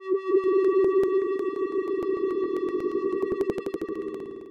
Triangle wave ringing
An eerie kind of ring in a sci-fi setting.
Created using Chiptone
sounddesign, noise, effect, fx, future, synth, game, lo-fi, digital, weird, 8-bit, electric, electronic, retro, abstract, video-game, sound, glitch, video, sound-design, alien, freaky, strange, videogame, machine, soundeffect, sfx, sci-fi